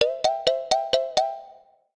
Xylophone for cartoon (16)
Edited in Wavelab.
Editado en Wavelab.
cartoon, animados, xilofono, xylophone, dibujos, comic